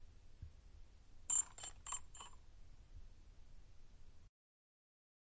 FXLM drone quadrocopter startup clicks close T02 xy
Quadrocopter recorded in a TV studio. Zoom H6 XY mics.
h6, engine, close, startup, clicks, flying, propeller, drone, xy, warmup, helicopter, ticks, quadrocopter